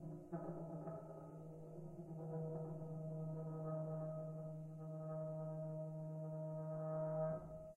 Gate Screech
An old gate opening. Tweaked within Audacity.
Audacity, Gate, Ominous-Screech, Field-Recording